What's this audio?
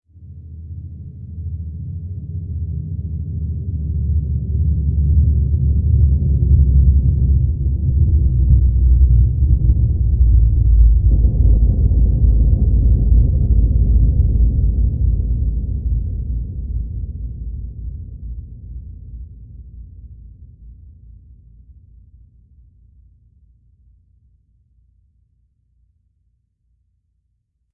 Deep reversed boom (with reverb)
This is a slow, low pitched, boom that has been reversed and reverb effect has been added to it. Perfect for a eerie atmosphere! Created using FL Studio 10, using their kick sound. This is my first sound. Enjoy.
weird, scary, eerie, awake, reverse, horror, deep, atmosphere